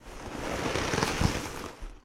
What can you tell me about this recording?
The sound of someone standing up from a leather couch. Made of a leather jacket.